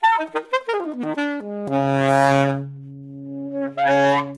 An atonal lick on the alto sax, ending with a low overblown tone.